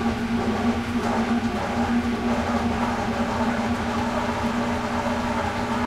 washing machine wash cycle transition
During the wash cycle.
wash, industrial, machine, transition, washing, water, cycle